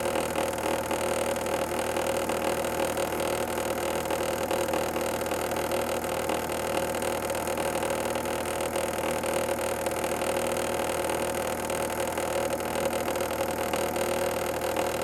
Tape Recorder loop
This sounds were recorded using a Zoom H1 and a Rode Videomic, which were recording the mechanics of an old tape recorder.